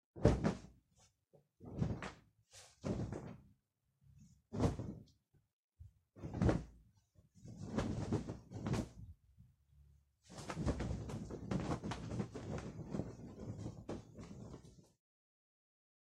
24. Agitar Tela
for fire and fabric
foley, leather, wave